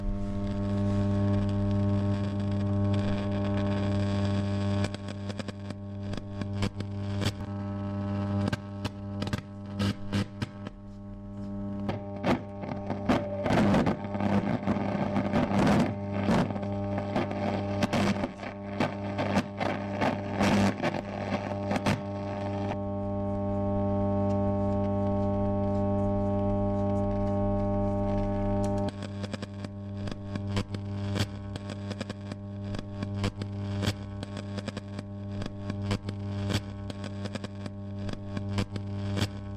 Sound of an old radio
crackle, old, radio